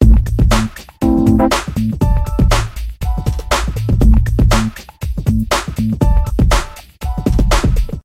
A groovy hiphop-ish beat. Unfortunately not a perfect loop but i'm sure you can fix that.
Made with mixcraft 6 and the free samples that are in the software.